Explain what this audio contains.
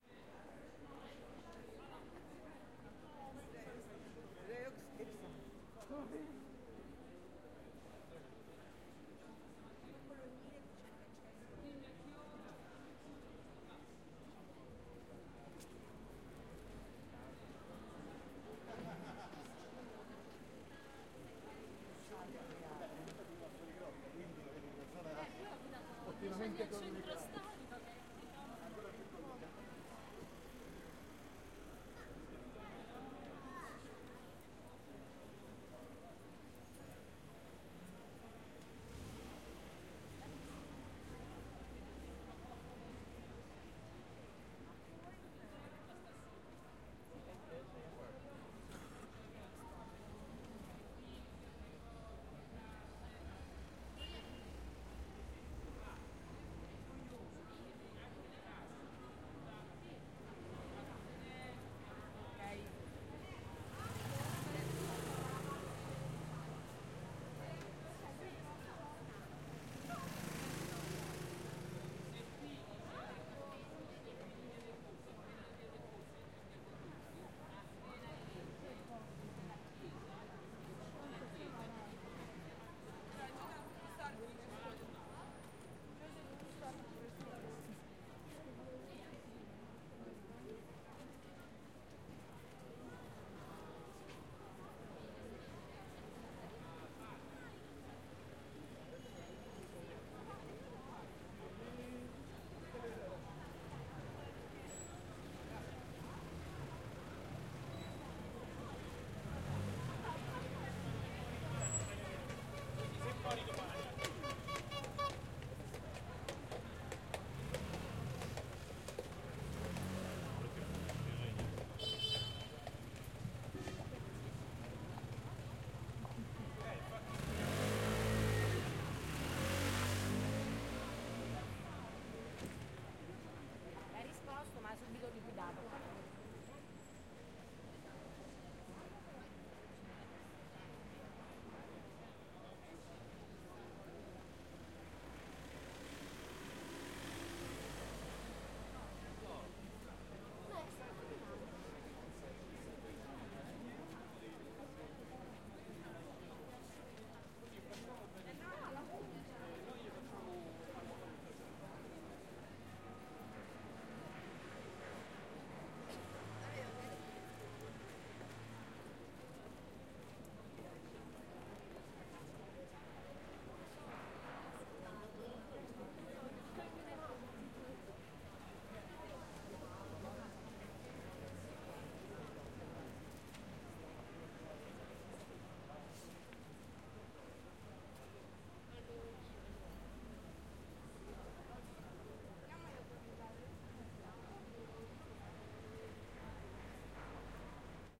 Ambience Rome Via Condotti 01
Condotti, pedestrians, Via, Rome, Ambience